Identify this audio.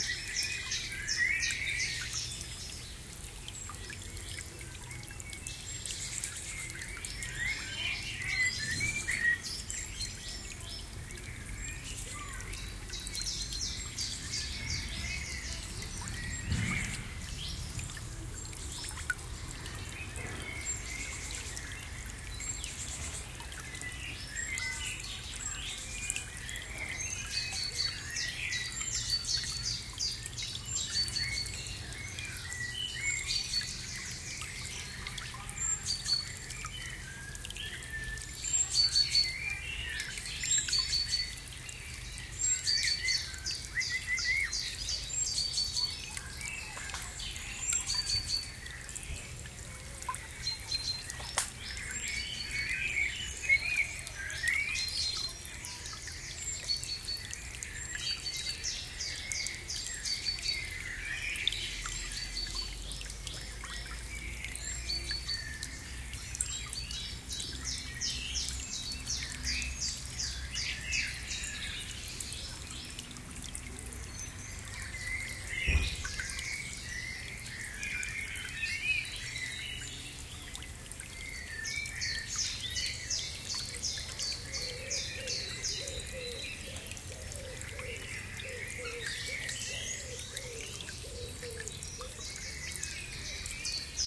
Small Creek & Birds
A small creek and lots of birds in the surroundings.
Recorded with a Marantz and two DPA 4061s on the ground with two metres between them.
river, summer, forest, liquid, water, background-sound, ambient, relaxing, ambience, flow, stream, nature, trickle, wild, creek, atmos, soundscape